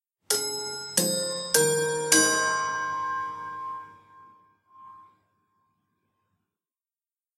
Westminster Quarters, Part 2 of 5
Plastic pen striking sequence of four rods from this set of grandfather clock chimes:
Roughly corresponds to G#4, C5, A#4, D#4 in scientific pitch notation, which is a key-shifted rendition of the second grouping from the Westminster Quarters: